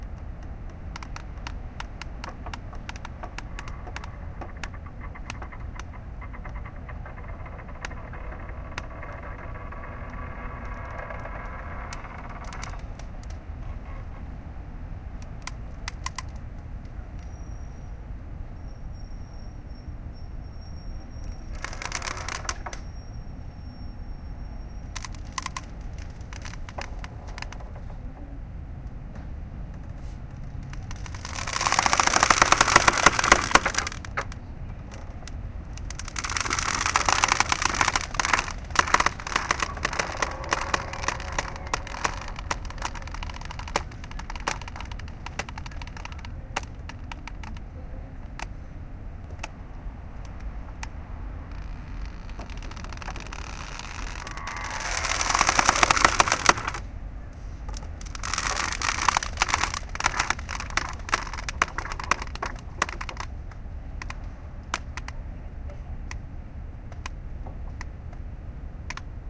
Sound of a large, long, mooring rope fastened to a large cleat, holding a ferry boat at a dock on San Francisco Bay.
Recorded with a Sony MZ-RH1 Mini disc and unmodified Panasonic WM-61 electret condenser microphone capsules.